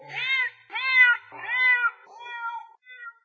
Cat meow
My neighbour's cat from across the road is very vocal. I've removed additional noise from the recording as much as possible.